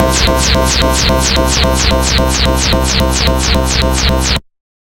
40-8th Dubstep Bass c3
Dubstep Bass: 110 BPM wobble at 1/8th note, half of the samples as a sine LFO and saw LFO descending. Sampled in Ableton using massive, compression using PSP Compressor2. Random presets with LFO settings on key parts, and very little other effects used, mostly so this sample can be re-sampled. 110 BPM so it can be pitched up which is usually better then having to pitch samples down.
110, bass, beat, bpm, club, dance, dub, dub-step, dubstep, effect, electro, electronic, lfo, loop, noise, porn-core, processed, rave, Skrillex, sound, sub, synth, synthesizer, techno, trance, wah, wobble, wub